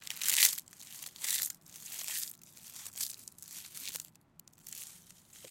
crunch munch
Crushed leaf, mimicking sound of chewing.